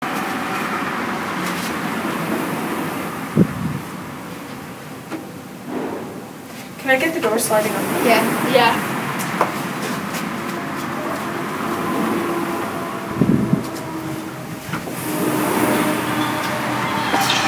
MySounds GWAEtoy Dooropening
field, recording